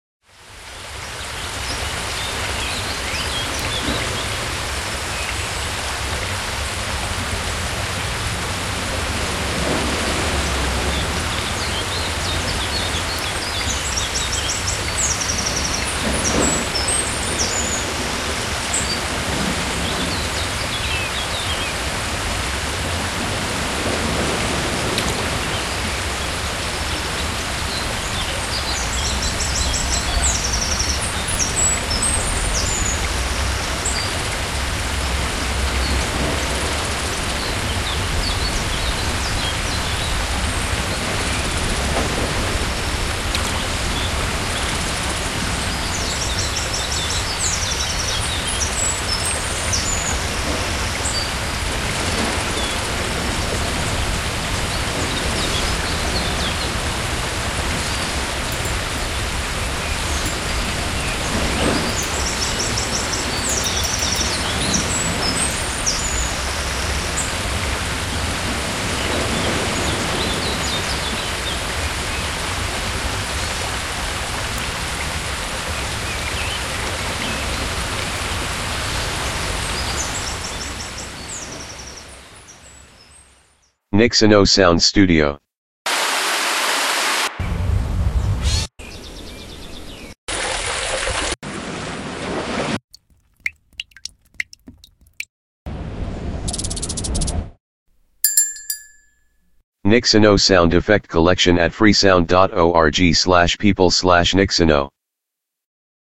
Forest and Waterfall Ambience
* Mix : 7 different sound FX
2 line forest and birds sound + 2 line waterfall sound + 1 line river sound + 1 line wind sound + 1 line jingle and water drop sound
* Record: Zoom H4N Pro, Steinberg UR22, Blue Spark, iPad 2
* Plugin: 30 Bond EQ + FabFilter Pro R + FabFilter L
amb, ambiance, ambience, ambient, atmos, atmosphere, background, fx, general-noise, nature, soundscape, water, white-noise, wind